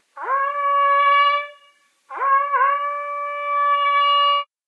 A stereo field recording of a hunting horn. Rode NT-4 > FEL battery pre-amp > Zoom H2 line in.
hunting, stereo, hunting-horn, xy, horn, field-recording